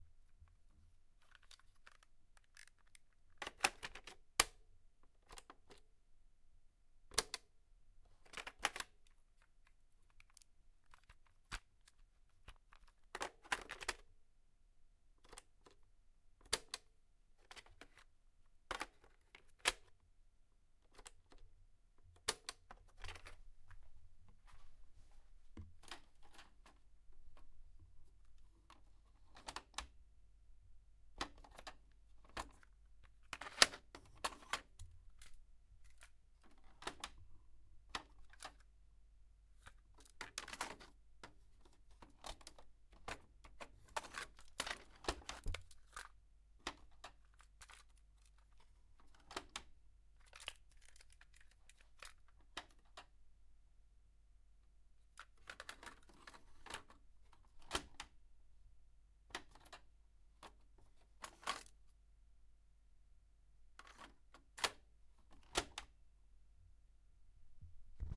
Tape deck mechanical sounds zoom4295
mechanical, deck, sounds, Tape